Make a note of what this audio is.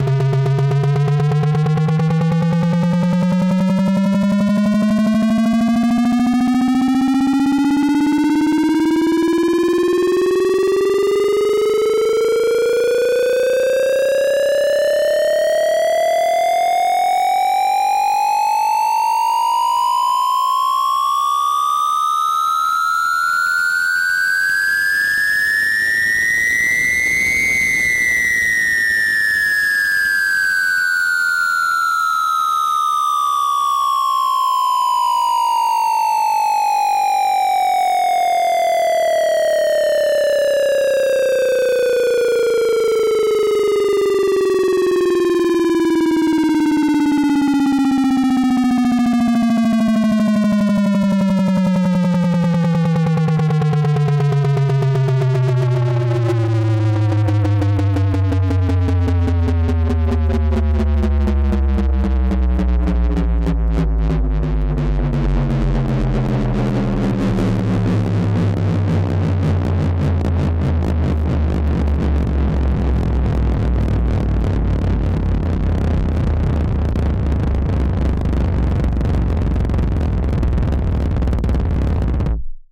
Firework/Bomb Dropping/Riser
Sounds like the uplift to the drop of an EDM track, but can also be used as a firework sound effect, or for a bomb dropping.
Created using a low-passed square wave, over-compressing it, distorting it, playing 2 notes a semitone apart and pitch shifting.
missle, uplift, drop, bomb, boom, FX, riser, whistle, fire-works, rockets, war, fire-crackers, bullet, explosion, rocket, battle, firecrackers, fourth-of-july, firework, gun, fireworks